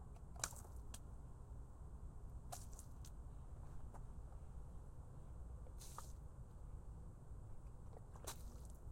Water Splashes on cement FF235
Water liquid splash splat spill on cement